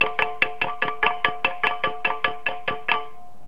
experimental sound recorded with my handy and after that i cutted it in soundforge.
this one is a peanut can.strange high sequence.
best wishes!
can, drum, electronic, record, sequence